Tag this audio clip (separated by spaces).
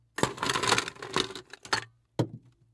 ice Scooping